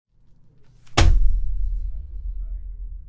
19-papel golpea mesa
A sheet of paper hitting a table
paper, sheet, sounds, table